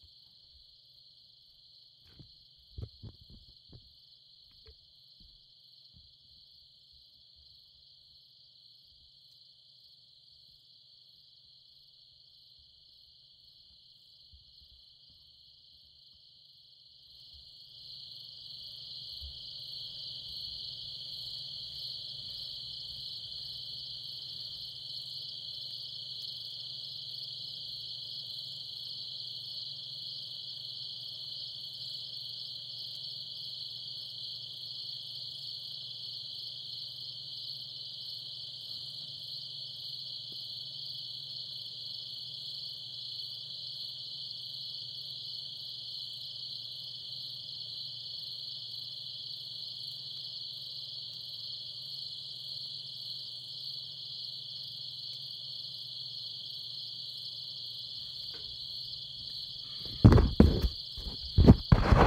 Desert Ambiance. Night in a natural desert at Terlingua, Texas. Recorded with Sound Devices 633 and Sennheiser MKH50 with Baby Ball Gag.
Credit:
Rayell Abad